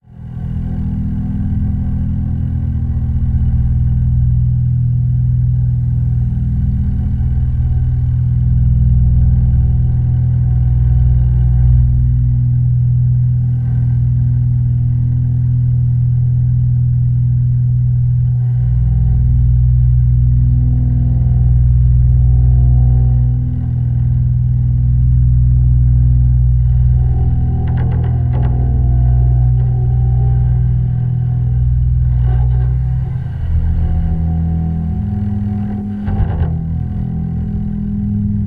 An electric cello ambience sound to be used in sci-fi games, or similar futuristic sounding games. Useful for establishing a mystical musical background atmosphere for building up suspense while the main character is exploring dangerous territory.